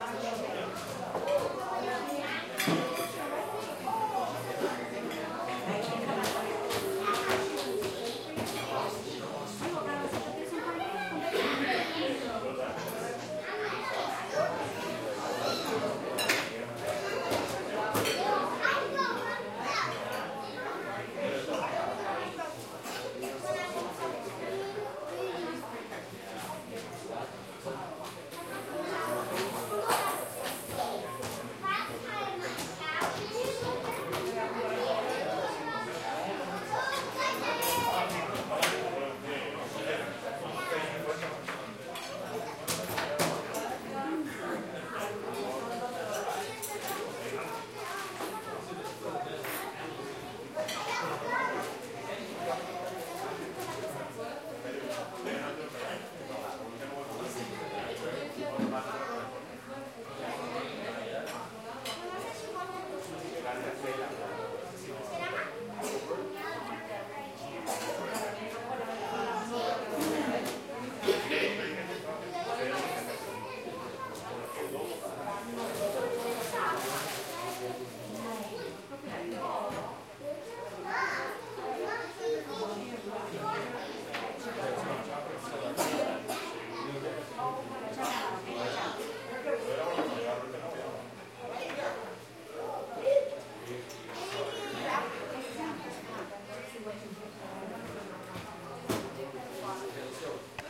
Gilroy Diner Ambience During Brunch
Taken at Longhouse Restaurant in Gilroy, CA.
breakfast, brunch, cafe, diner, dining, family, food, restaurant, silverware